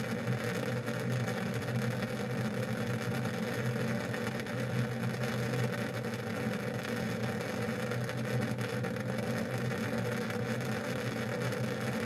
Gas Wall Heater
Short, direct recording of a gas powered wall heater's open flame.
Appliance, DR-100, Fire, Flame, Gas, Heater, II, Mk, Tascam